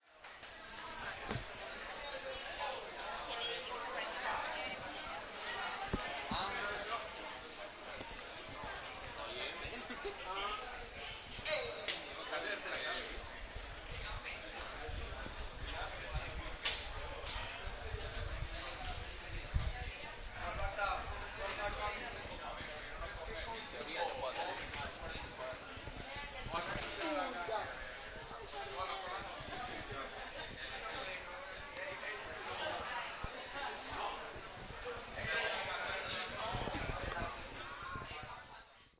Ambiente Bar
Sonido ambiente de un bulevar
Bar, Sonido, Ambiente